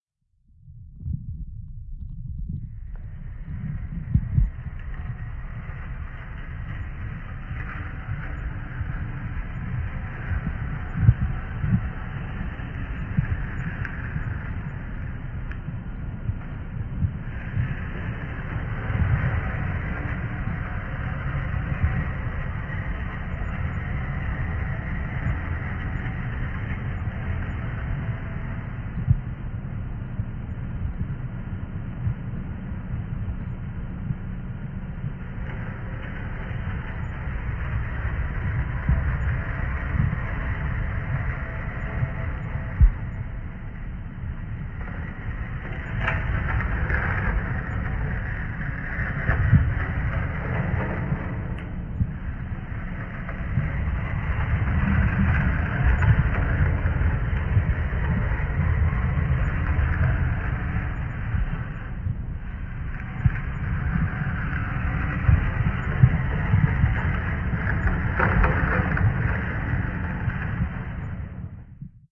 I recorded with an MP3 player the sound of pushing a chair on the floor very slowly. Then added a layer of "dirt" taken the low frequencies from the "fire" sound. All layers timestretched and filtered again.